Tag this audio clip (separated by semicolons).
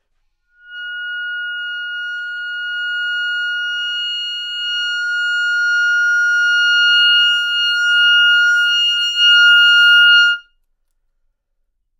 clarinet; F6; good-sounds; neumann-U87